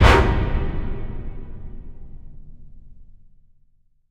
Cinematic Hit 3
A lot of effort and time goes into making these sounds.
An orchestral hit you might hear in a television show, movie, radio play, etc. I personally imagine hearing this when a vengeful hero lands a heavy blow on their sworn enemy.
Produced with Ableton.